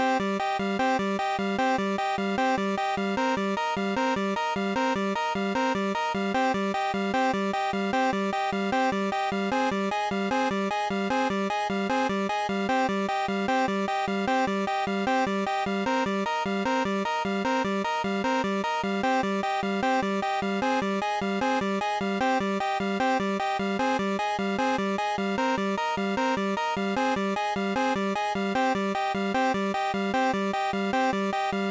Pixel Song #27
Loopable, Pixel, Music